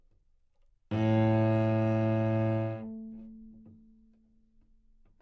Part of the Good-sounds dataset of monophonic instrumental sounds.
instrument::cello
note::A
octave::2
midi note::33
good-sounds-id::4274
cello, single-note, neumann-U87, A2, good-sounds